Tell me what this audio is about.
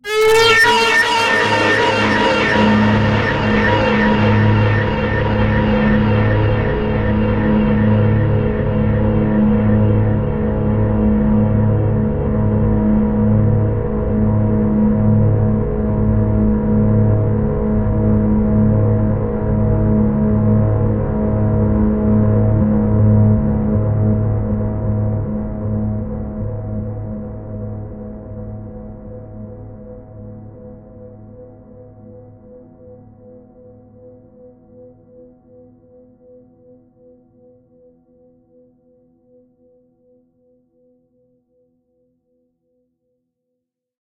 Hi everyone!
SFX for the scream moment in horror game or movies.
Software: Reaktor.
Just download and use. It's absolutely free!
Best Wishes to all independent developers.
phantom, sinister